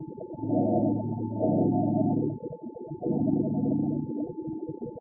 minato2smaller2
Created with coagula from original and manipulated bmp files. Made from spectrogram of speech.
spectrogram, image, synth, space